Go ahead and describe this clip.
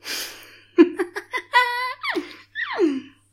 A witchy laugh.